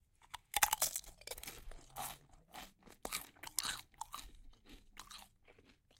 Eating Chips
Crunch, crunchy